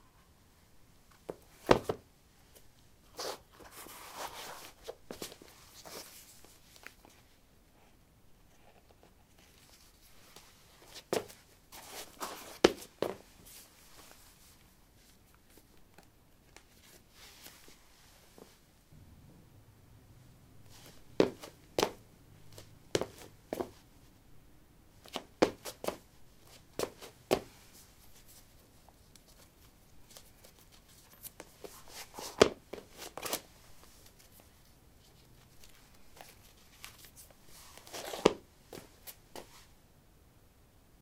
lino 05d summershoes onoff
Putting summer shoes on/off on linoleum. Recorded with a ZOOM H2 in a basement of a house, normalized with Audacity.
step, footsteps, steps, footstep